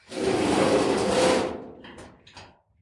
small-metal-scrape-05
Metal rumbles, hits, and scraping sounds. Original sound was a shed door - all pieces of this pack were extracted from sound 264889 by EpicWizard.
bell, blacksmith, clang, factory, hammer, hit, impact, industrial, industry, iron, lock, metal, metallic, nails, percussion, pipe, rod, rumble, scrape, shield, shiny, steel, ting